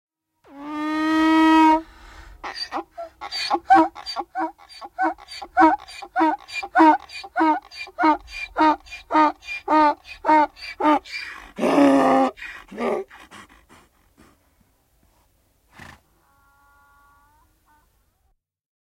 Aasi huutaa / Donkey roaring, yelling
Aasin huutosarja.
Paikka/Place: Egypti / Egypt, Deir Abu Hennis
Aika/Date: 1978
Animals, Domestic-Animals, Field-Recording, Finland, Finnish-Broadcasting-Company, Soundfx, Suomi, Tehosteet, Yle, Yleisradio